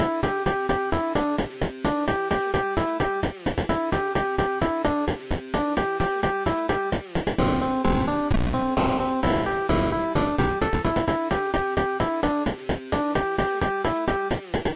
Video game music loop
Video game-esque music loop. Perfect for games, animations, and other types of media. Made in BeepBox.
chip, 8-bit, vgm, bleep